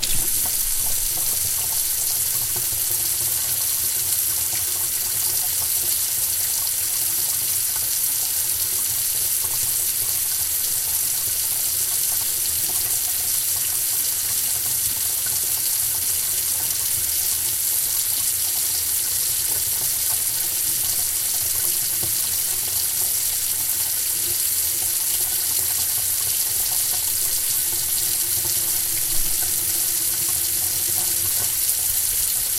Water-spray-in-sink-draining-sndREV
water spraying into metal sink